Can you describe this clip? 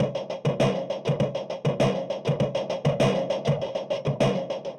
SIMPLE DRUM LOOP SHORTENED SOME MORE
drum-loop, processed